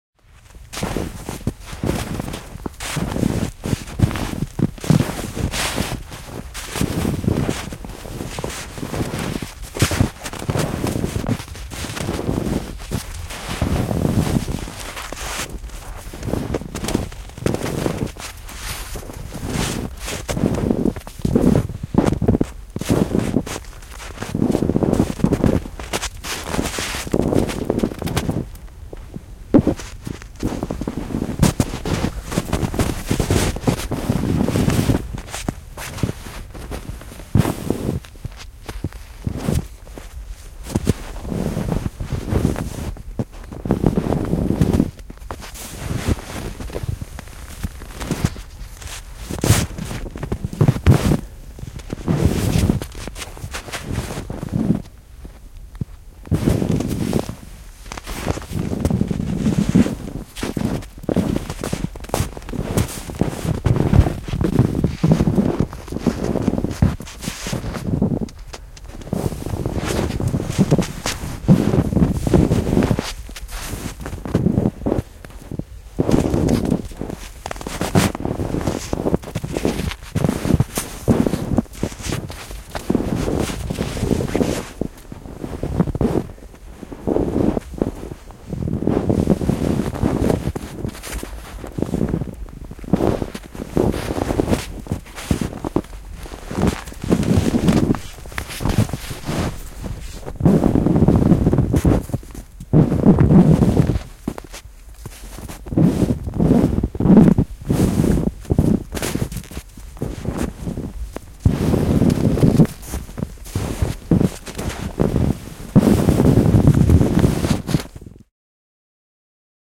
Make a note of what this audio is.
Lumipalloa pyöritetään nuoskalumessa, narinaa, myös askeleita lumessa, lähiääni.
Paikka/Place: Suomi / Finland / Vihti, Ojakkala
Aika/Date: 29.03.1996